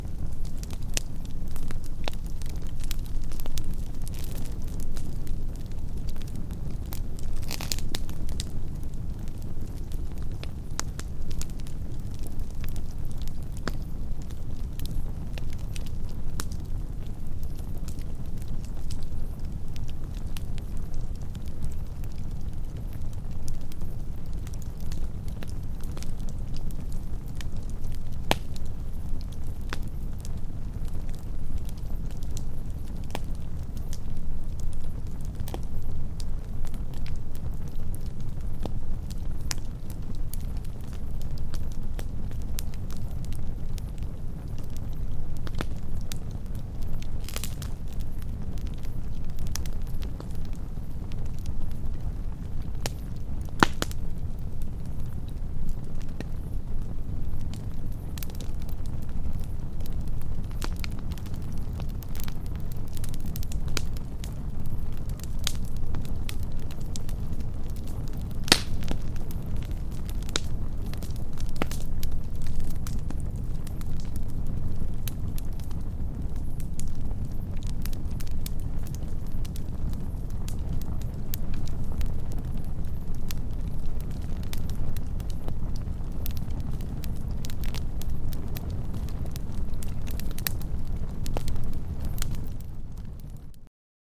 Feuer - Lagerfeuer 2
Sound of a campfire
Version 2
burning, campfire, field-recording, fire